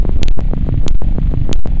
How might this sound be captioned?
Crunch4LP
noisey 1-bar rhythmic loop made in Native Instruments Reaktor